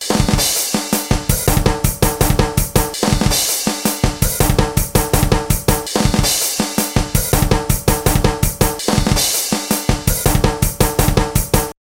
Drum loop 1
Tempo is 82. Used these in a personal project. Made with CausticOSX.
beat, drum, drum-loop, dubstep, garbage, groovy, improvised, loop, percs, percussion-loop, quantized, rhythm, rubbish, sticks, tamax, trance